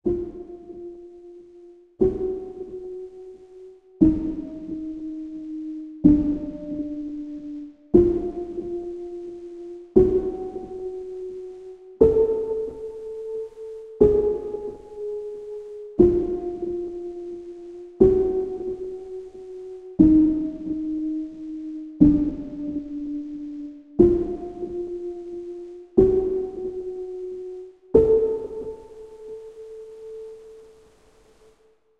A spooky piano-thingy I did in GarageBand for something called Victors Crypt. Suited for something spooky, horrific I think. Hope you like it...